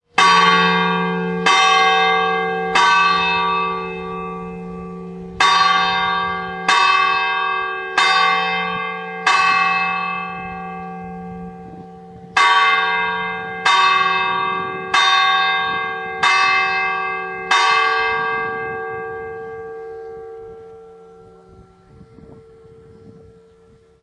sorana bells 345 campanile
Audio extracted from Sony camcorder film taken in medieval hilltop village of Sorana in Tuscany, Italy.
taken directly below an approx. 25m belltower (campanile).
I understand from locals that the 3-4-5 bells are a call/end to work in the fields which still occurs to some degree and are every day at 6.45am, 12.15pm and 6.15m.
religion, bells, campanile, italy, bell, church, ring